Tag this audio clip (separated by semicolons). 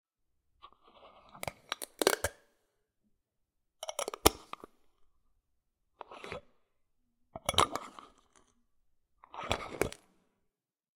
close; closing; glass; glass-bottle; open; opening; pill-bottle; pills